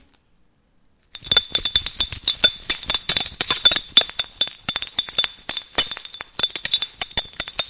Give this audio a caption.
Two medals clanking against one another.